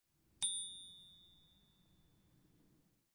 A single strike of a bike's bell
aip09, bell, ring